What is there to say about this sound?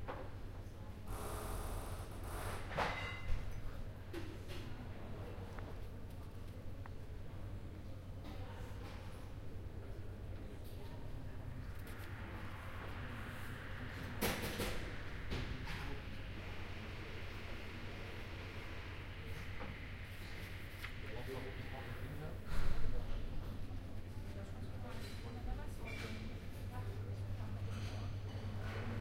P1a ZMK cafeteria after 18
ZKM Karlsruhe Indoor Bistro
restaurant, afternoon, eating, ZKM, reverberant, indoor, cafeteria, people